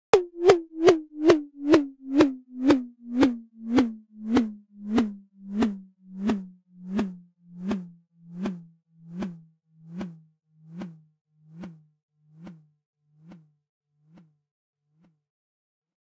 Tom Forward Backward loop fading out
fade, loop, out, tom